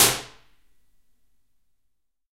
i just recorded some IRs of different rooms of my appartement with a sine sweep and that tool of voxengo.
This is how it sounds like when you listen to music coming from my room sitting in the kitchen